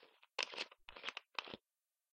Open cup sound